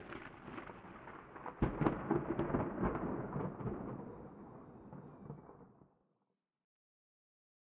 balfron thunder J
Field-recording Thunder London England.
21st floor of balfron tower easter 2011